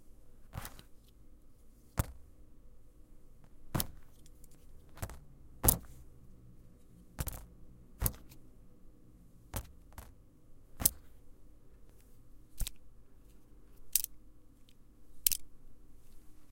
Cutter picking up, juggle.
playing around with cutter. Picking up, jugling